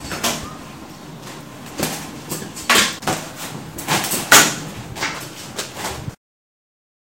strong sound wind